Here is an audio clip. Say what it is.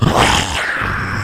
Z-Attack 03a From my ZOMBIE VOICE sound pack.